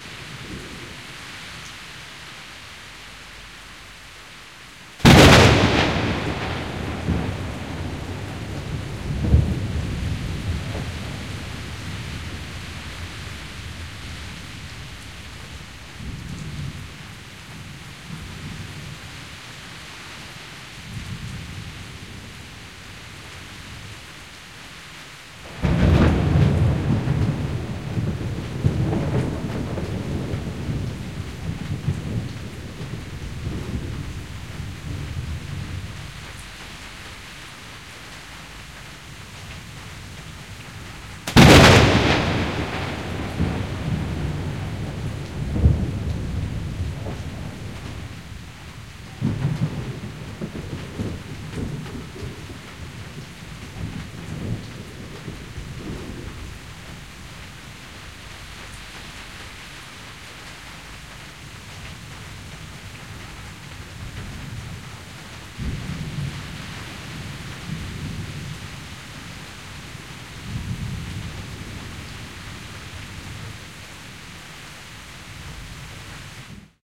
Several thunders with light rain.